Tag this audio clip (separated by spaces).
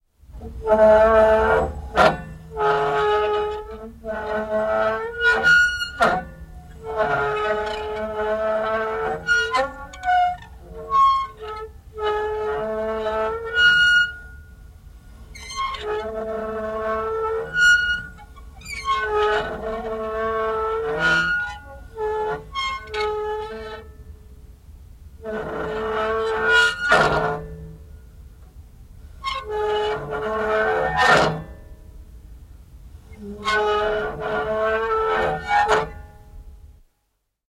Crank Crank-handle Creak Field-Recording Finland Finnish-Broadcasting-Company Kampi Kierrekampi Metal Metalli Narina Narista Soundfx Squeak Suomi Tehosteet Turn Vinkua Vinkuminen Yle Yleisradio